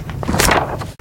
Book Turn Page 1
Variation of turning a page of a book
book, page, turn, turning